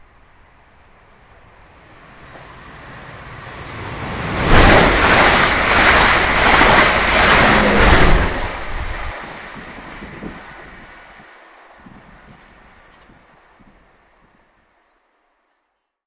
Raw audio of a British commuter train passing from right to left. The recorder was about 1m away from the train. There was a lot of wind interference following after the train, which I have tried to edit out (you can probably do a better job).
An example of how you might credit is by putting this in the description/credits:
The sound was recorded using a "H1 Zoom V2 recorder" on 26th October 2016.